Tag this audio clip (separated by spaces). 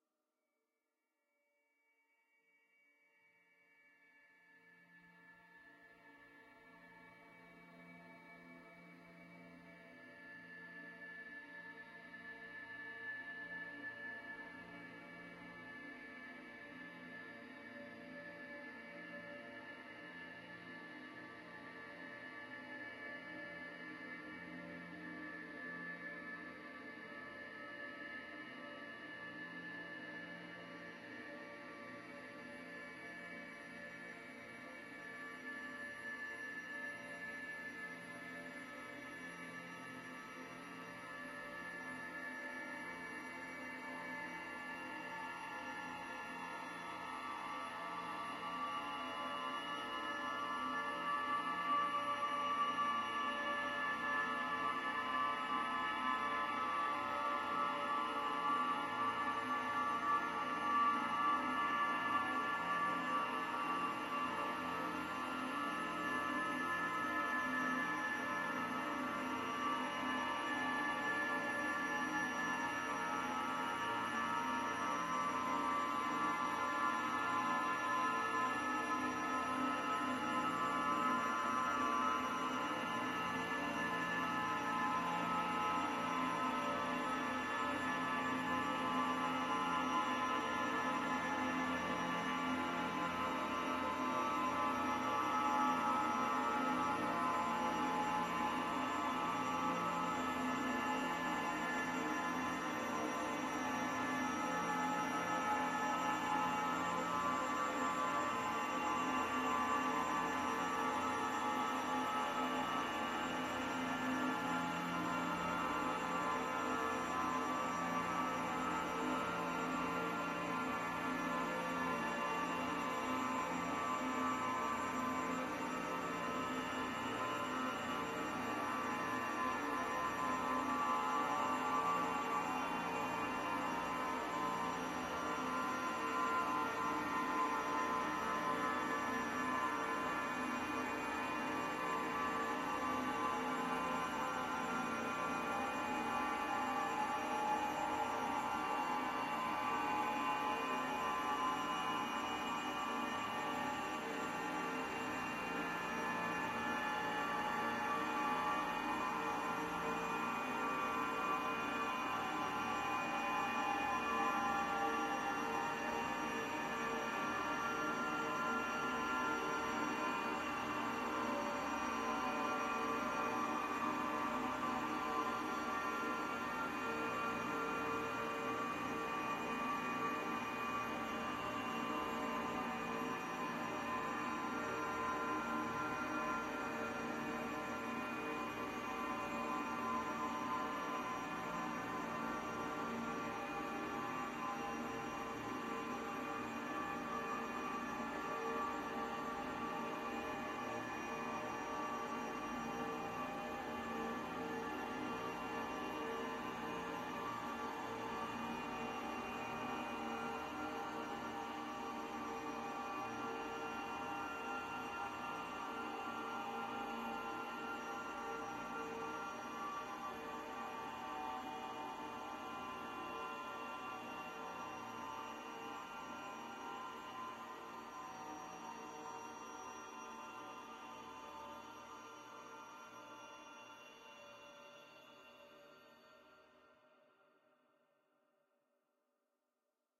experimental divine pad evolving multisample dream drone soundscape sweet